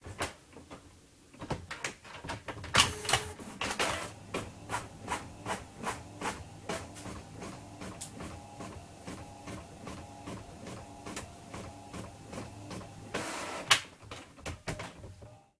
My Canon printer printing. 0 licence. Recorded with a 4th gen ipod touch, Edited with audacity